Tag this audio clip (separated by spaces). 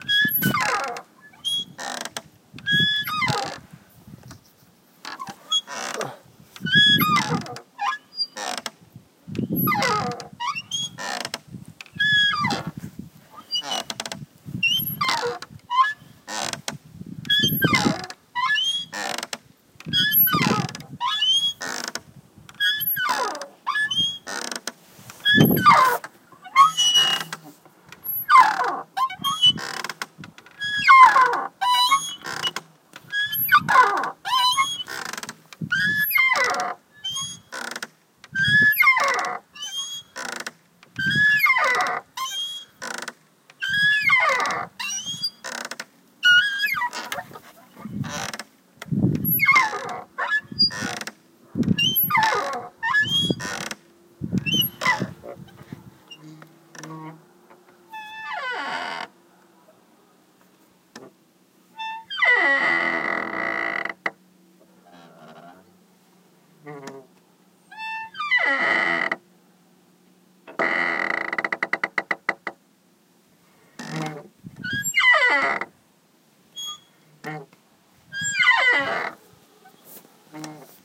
playground squeaks Swing